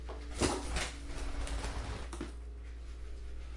Sherry - Gangsta Flapper - c#
Sherry was in the mood to fly today she made this flapping sound in the basement, I would say she was airborne for about 1.3 seconds. It was c# in audacity. I have yet to upload Sherry's water dish sounds, she enjoys baths like most birds.